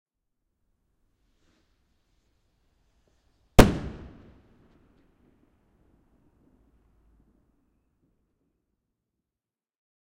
A single firework with almost no other background noise (almost). Recorded in Southampton, UK on New Years Eve 2018 on a Zoom H5 with custom mic capsules.